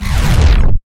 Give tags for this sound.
sound-design sfx mechanic technology transformer tech sounddesign future electric digital robot effect sci-fi movement

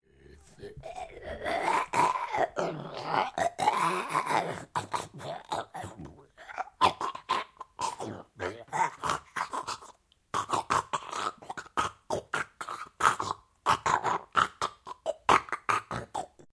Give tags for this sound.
eating groan zombie

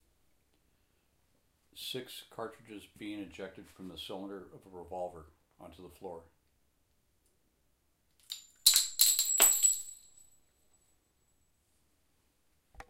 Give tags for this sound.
bullets cartridges casings floor gun hit shells spent

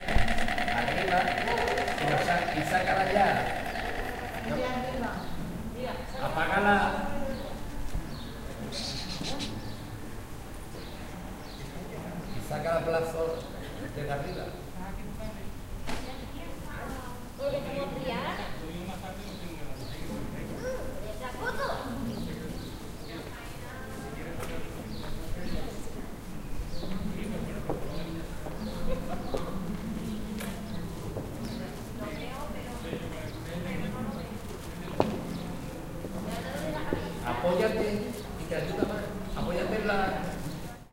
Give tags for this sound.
birds spain field-recording spanish caceres voice

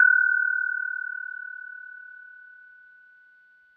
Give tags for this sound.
casio distortion pd perc phase vz-10m

